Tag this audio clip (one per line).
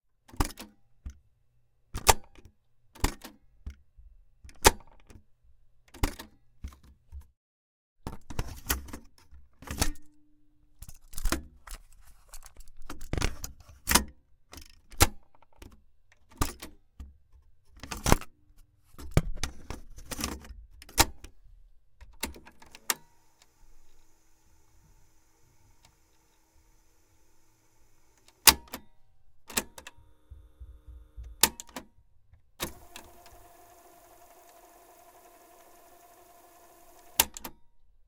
deck
close
open
cassette
rewind
tape